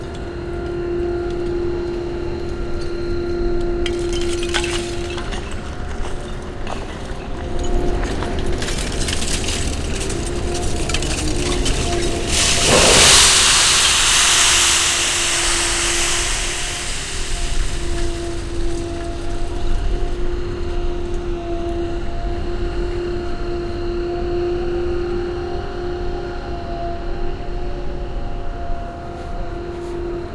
Metal scraps being piled on the docks of the Seville harbor. Shure WL183 capsules, Fel preamp, Olympus LS10 recorder. Recorded in the port of Seville during the filming of the documentary 'El caracol y el laberinto' (The Snail and the labyrinth), directed by Wilson Osorio for Minimal Films. Thanks are due to the port authority for permission to access the site to record, and in particular to the friendly crane operator